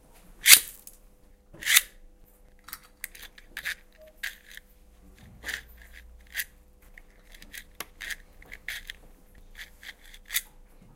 Sounds from objects that are beloved to the participant pupils at the Primary School of Gualtar, Portugal. The source of the sounds has to be guessed.